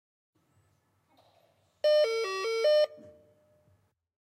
Door Bell
chime, ring, ringing